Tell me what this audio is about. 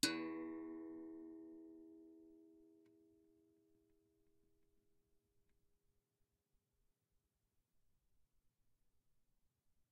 Berimbau note, medium intensity. No effects, no normalization.